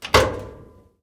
Appliance-Clothes Dryer-Door-Open-02
The sound of a clothes dryer door being open.
This file has been normalized and background noise removed. No other processing has been done.
Appliance, Clothes-Dryer, Door, Dryer, Metal, Open